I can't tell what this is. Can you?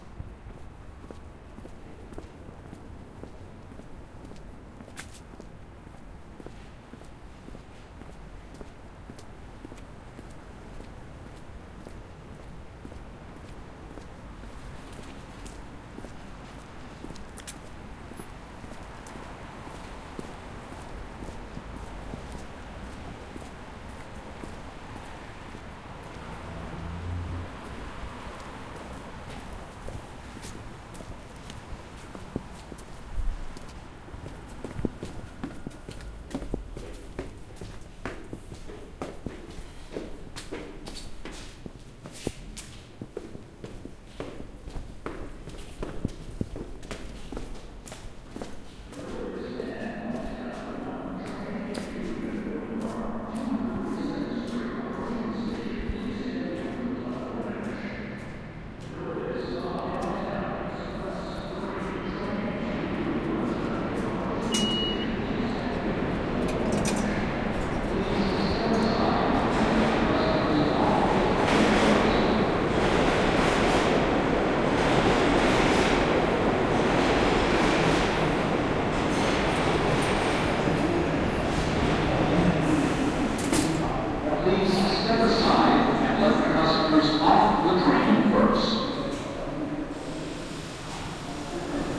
Walking into Chambers Street Station as a Train Arrives (NYC Subway)
Walking into Chambers Street Station as a train arrives (possibly a 3-train). NYC Subway.
*If an MTA announcement is included in this recording, rights to use the announcement portion of this audio may need to be obtained from the MTA and clearance from the individual making the announcement.
mta, new-york, station